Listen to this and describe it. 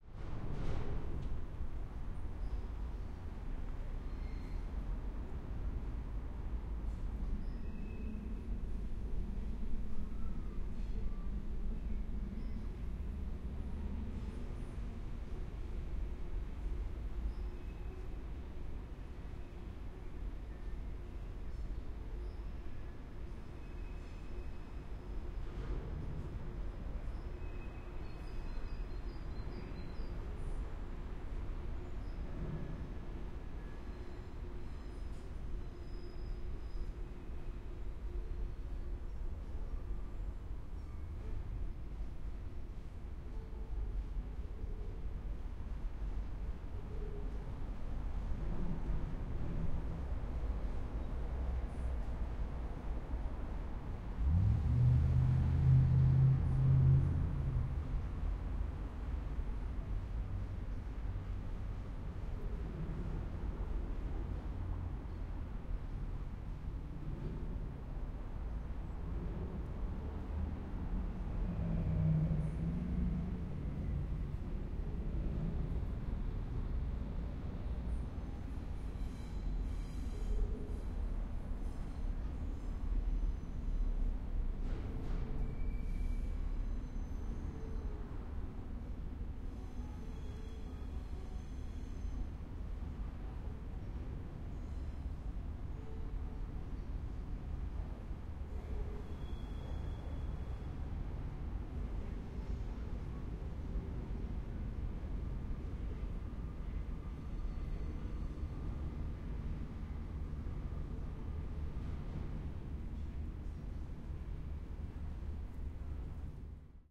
City ambiance in Cologne, Germany. Recorded near Rhine and train station.
Recorded with Tascam DR05